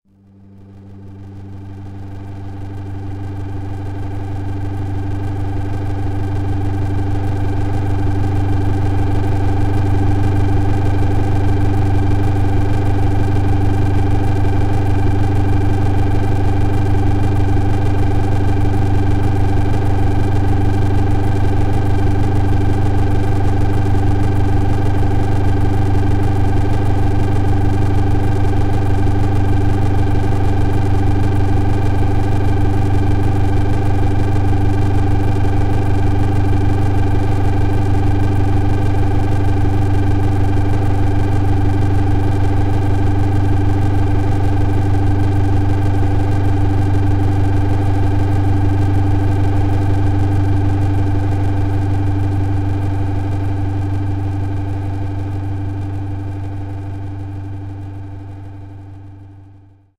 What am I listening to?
precession demo 4
60 seconds drone made with "precession", a drone generator I'm building with reaktor.